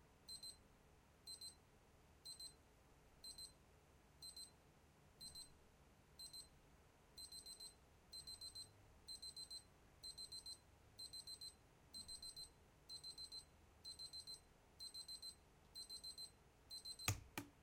the sound of a digital alarm clock, which stops when the "snooze" button is pushed. recorded with SONY linear PCM recorder in a dorm room.
aip09, electronic, dormitory